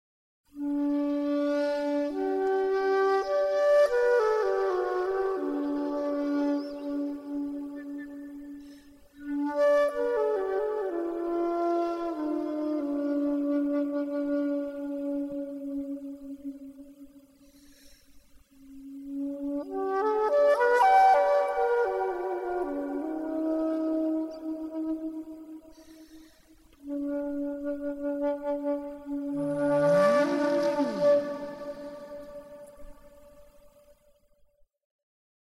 FLUTE intro
I play the flute with an applied echo. A short, melancholy melody could be used as an intro or outro.